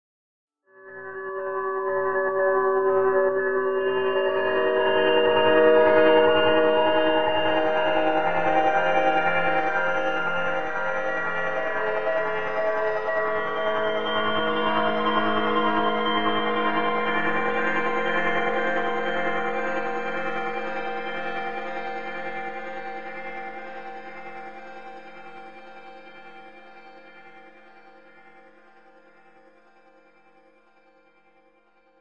A somewhat cold and quiet series of notes from Reaktor.
ambient, cold, cue, digital, eerie, spooky, synth